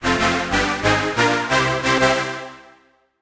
Jingle Win 00
An otherworldly synthesizer winning jingle sound to be used in sci-fi games. Useful for when finishing levels, big power ups and completing achievements.
achivement, celebrate, complete, futuristic, game, gamedev, gamedeveloping, games, gaming, high-tech, indiedev, indiegamedev, jingle, science-fiction, sci-fi, sfx, video-game, videogames, win